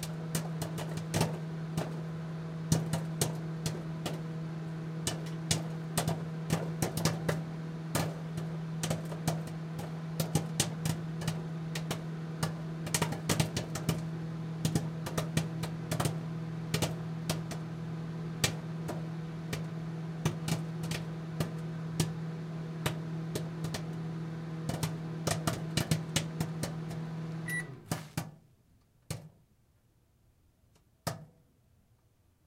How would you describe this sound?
Popcorn popping in a microwave.